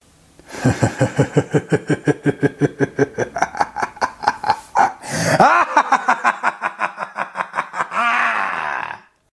Evil Laugh 4
Mad Man Evil Laughing Like A Villain
Bad, Crazy, Deep, Evil, Free, Guy, Halloween, Laugh, Laughter, Villain